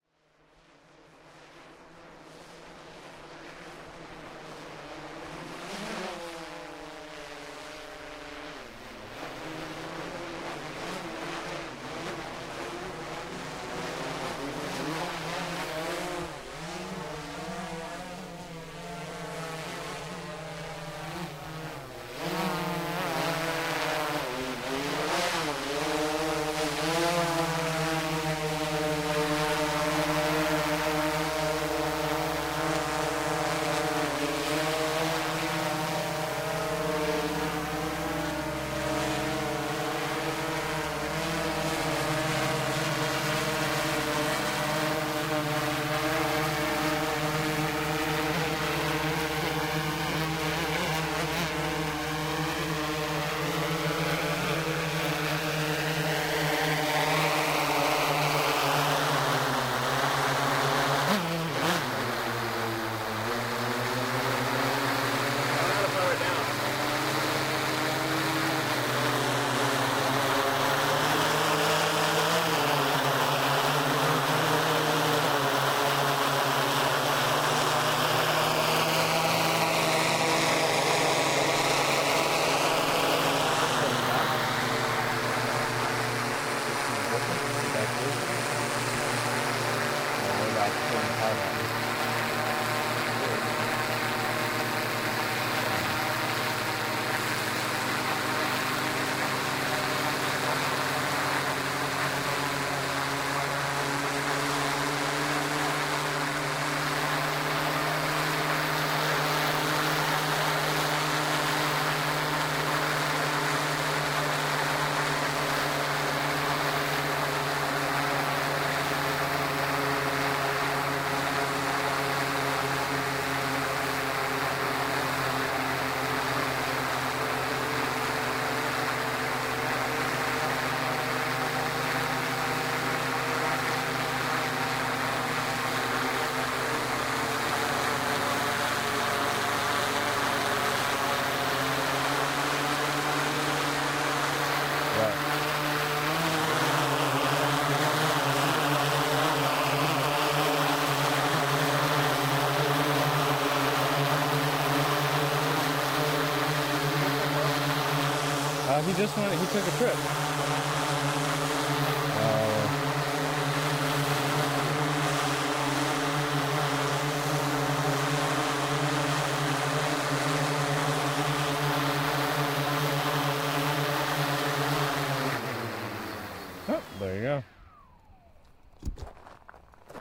15Y08M20-Drone Landing 01
Quadcopter drone fades in, hovers around. There's intermediate talking about half way through. An edited clip will be cut soon.